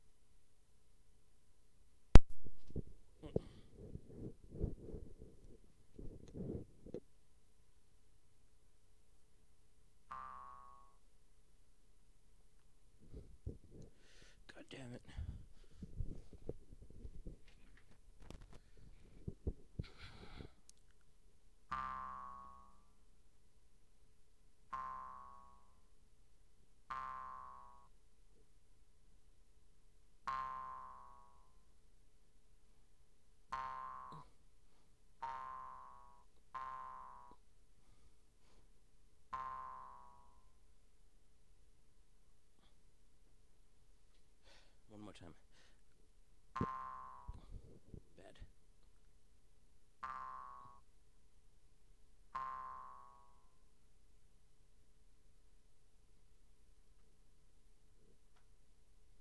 I pluck the Jew's harp several times looking for a good, clean hit.